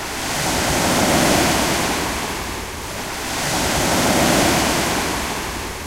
sea waves
This is the sound produced waves in Masnou beach. The sea is in calm.
It has been recorded using a Zoom H2.